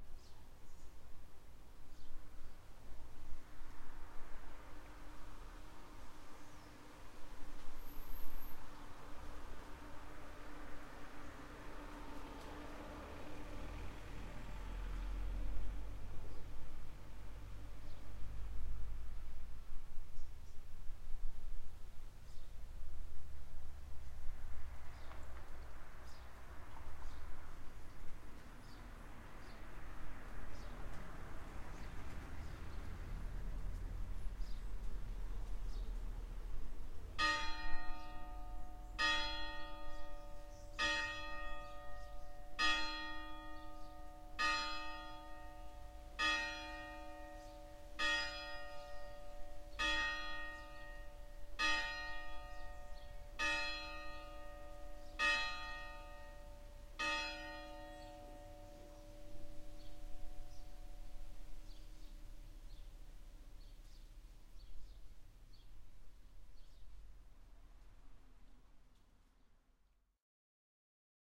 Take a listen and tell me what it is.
labege cloche +-01 and garden
church and garden in south of france